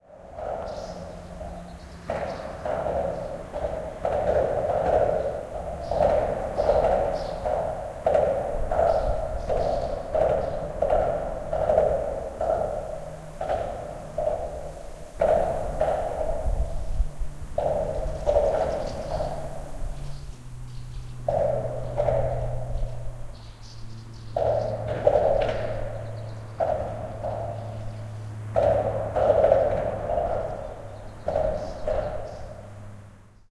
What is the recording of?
Under a bridge on the A20 autobahn near Neubrandenburg in Mecklenburg-Vorpommern (Mecklenburg-Western Pomerania). You hear cars passing by, heard as bumping sounds. Swallows are audible, who build their nests on the abutments. Recorded with an Olympus LS-11.